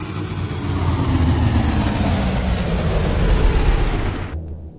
hackeysacklarryster3mod3
Sine wave created and processed with Sampled freeware and then mastered in CoolEdit96. Stereo simulation of mono sample stage one modulated with "hackeysacklarryb" glided down gently with care. Like the cycling down of a passing jet engine... This has to be one of the most beautiful sounds available.
sac, hacky, sound, synthesis, free, hackey, sample, sack, sine, larry